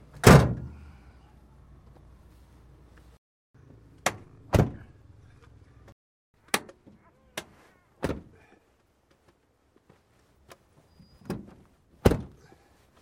truck pickup door open close real nice slam
pickup, open, truck, slam, close, door